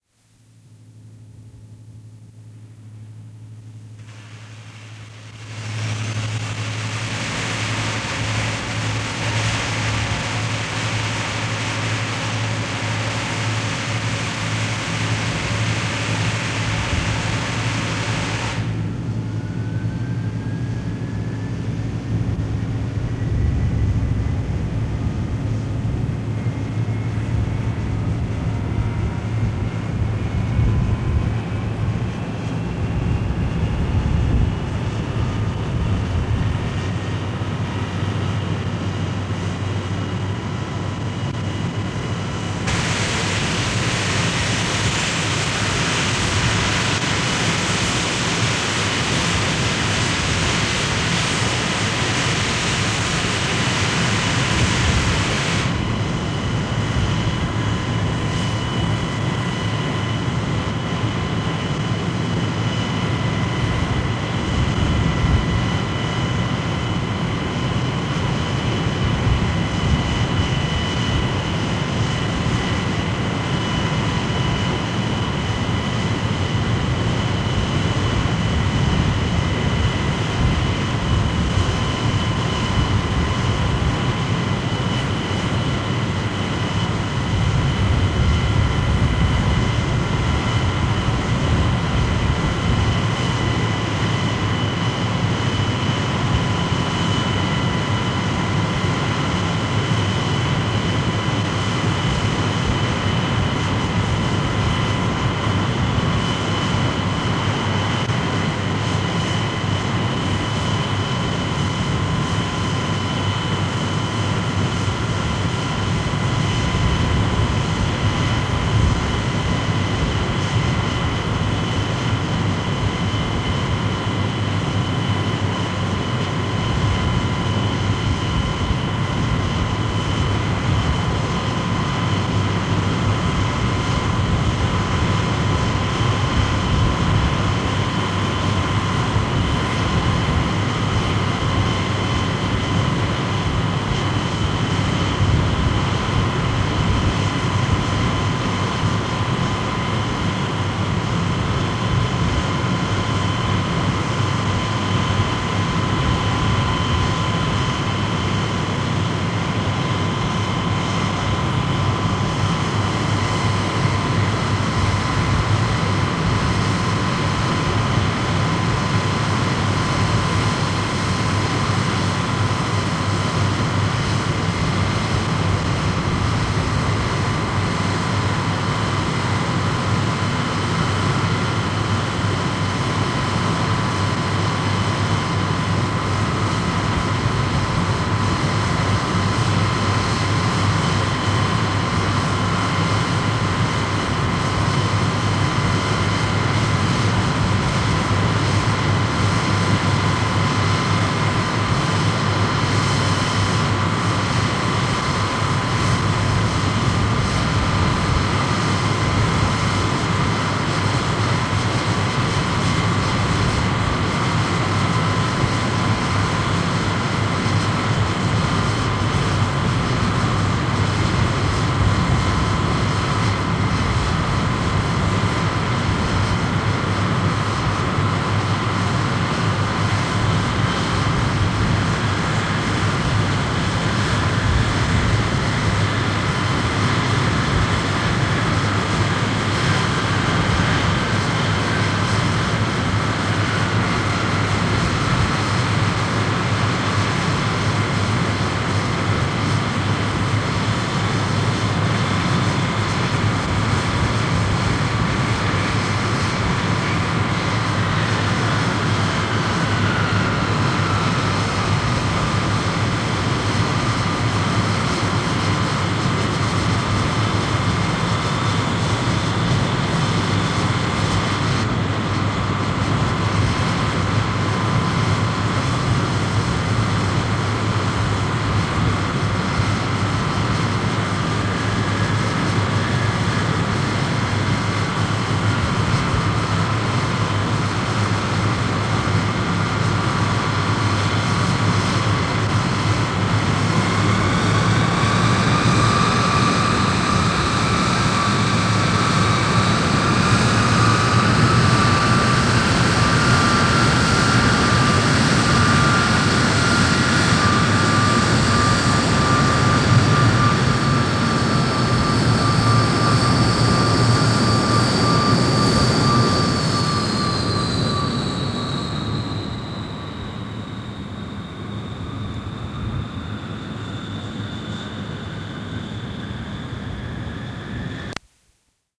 My older brother gave me a bunch of cassette tapes last year because he was moving. One was marked "F-101 STARTUP" and I was eager to hear it, knowing he had served in the American airforce in the late 1960's.
I was astonished to hear that it was a stereo recording. It was probably recorded via two Sony MTL F-96 Dynamic mics into a Panasonic "Compact Cassette" recorder.
There are all the low fidelity and dropout issues of cassette tape, but the stereo field is amazing. It sounds as though he simply pointed the mics in opposite directions.
You will hear some terrific white noise, which I assume is some sort of starter, and then the jet engines kick in. They warm up for about 5 minutes, and then they are cranked up a bit and the plane moves off.
The recording was probably made at K.I. Sawyer AFB in upstate Michigan. Due to the extreme cold there my brother describes the noise made by the jet engines as "sounding like cloth tearing".
f-101, field-recording, jet, warm-up